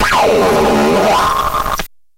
my voice though a homemade effect box. kind of a bit-crusher, phaser, pitch-shifting thing. very lo-fi because I like that kind of thing.